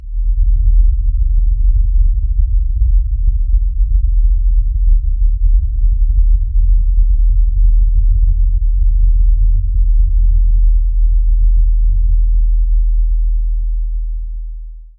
Low freq rumble
Low frequency rumble approx. 40 hz base. 15 sec.